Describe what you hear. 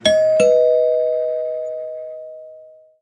DoorBell Shortened from: